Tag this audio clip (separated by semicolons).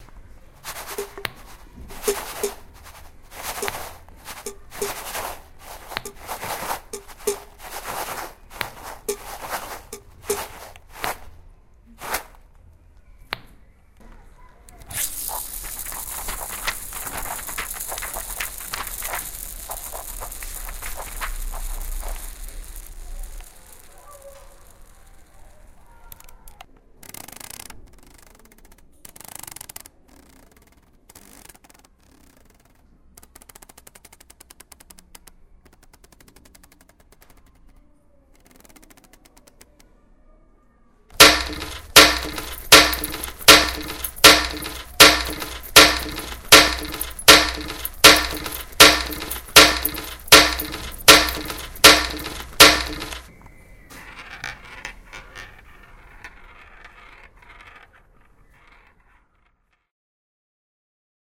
Belgium; CityRings; Composition; Soundscape; Stadspoortschool